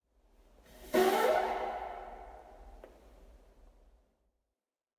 Long Midrange Fart
Real farts with some natural reverb. Recorded with a fucked up iPhone 7 in a disgusting screwed up pub. As always I was dead drunk and farted away on the lovely toilets there.
wow, human, voice, male, disgusting, pub, stink, drunk, flatulation, ambient, beer, fun, fart, reverb